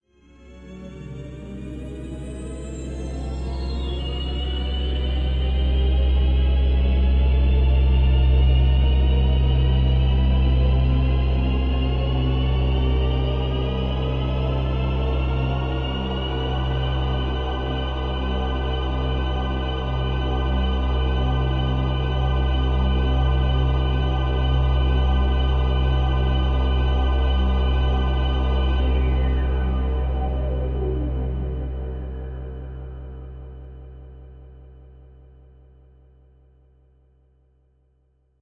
ambienta-soundtrack crystal airlines c4-100bpm
an ethereal pad done with crystal vst: 3 voices layered (basses, string and swirl waveforms), 3 reso-lopass filters, 3 lfo that slowly modulate pitch, filter frequency and pan. bounced @ 100 bpm. designed for the 'ambienta' soundtrack.